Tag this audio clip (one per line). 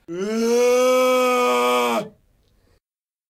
666moviescreams
Beard
Cridant
Crit
Fantasma
Ghost
Gritando
Grito
Hombre
Home
Male
Monster
Monstre
Monstruo
Oso
s
Scream
Screaming